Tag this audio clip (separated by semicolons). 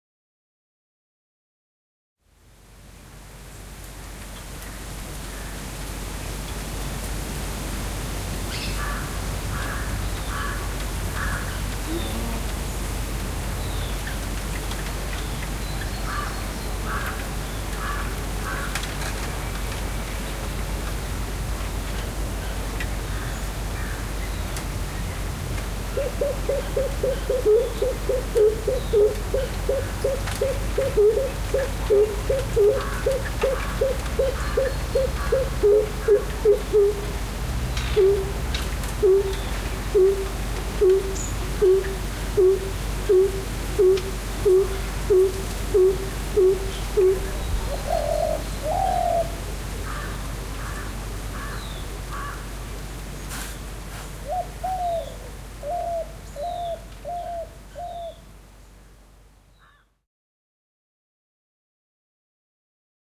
Farm; Atmosphere; Field-Recording